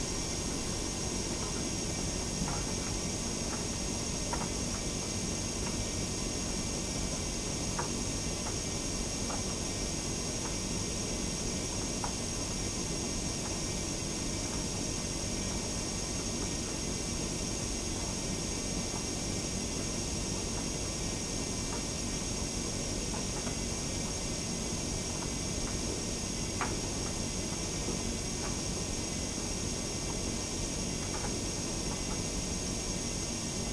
Dryer machine
Recording of my dryer, drying my clothes after they've been washed.
cleaner, cleaning, clothes, dryer, drying, laundry, machine, wash, washer, washing, washing-machine, working